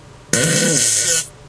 fart poot gas flatulence flatulation explosion noise weird
beat, car, computer, fart, flatulence, gas, weird